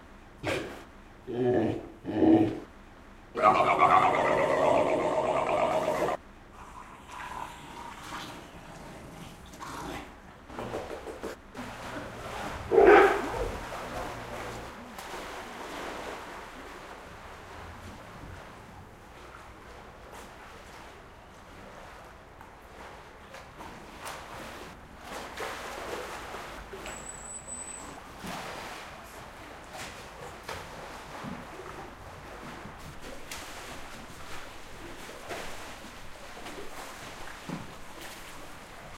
Aquarium - Seal Vocalizations
Recorded in stereo with a Zoom H6. Various seal sounds and vocalizations initiated by a trainer at a demonstration in an aquarium.